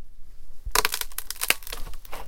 Sound of cracking wood